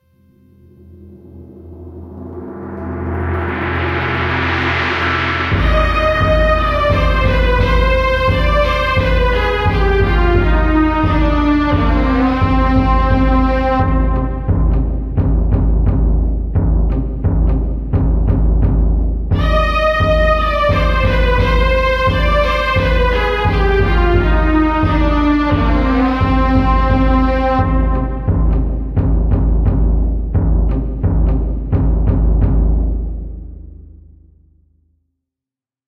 A classic oriental sample with fundal strigs, piticcato and timpan beat.
beat, old, soundscape